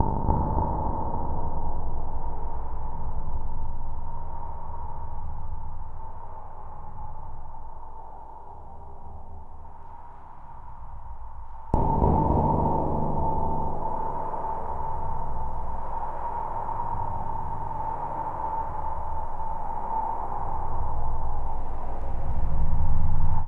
ambienta-soundtrack metaphisical-death
dark synthesized sound bounced out of metaphisical function standalone some years ago, now strongly re-processed in soundforge. It's designed to be placed @ the end of a mix, after overloads and devastations, as 'death sound'tail. This is part of a soundesign/scoring work for a show called "Ambienta": an original performance that will take place next summer in Italy. It's an ambitious project that mix together different artistic languages: body movement, visions, words.. and of course, music and sounds. Maybe useful for someone else.
tension, ambience, cinematic, death, dark, sad, tense, soundesign, scoring, synth, effect, fx, sinister, atmosphere, sound-effect, scary, illbient, bad, abstract, spooky, synthesizer, processed